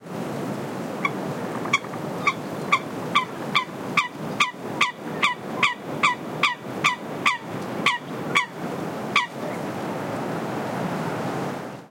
Single Coot calling, wind noise in background. MKH60 + MKH30 into SD Mixpre-3, decoded to mid-side stero with free Voxengo plugin. Recorded near Centro de Visitantes Jose Antonio Valverde (Doñana National Park, Spain)